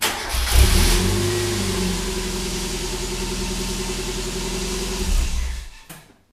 Mazda MX5 (Miata) Starting up and stopping the Engine from Outside